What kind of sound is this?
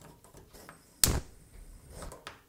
Gas stove clicking fire burner